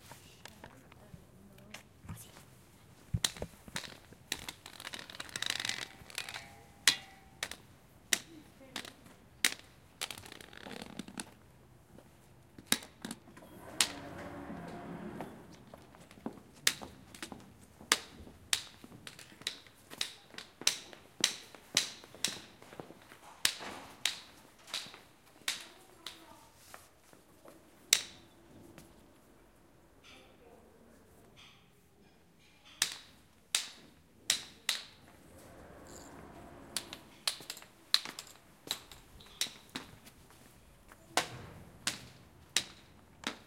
A recording in the entrance/exit of the school building as the automatic opens and closes.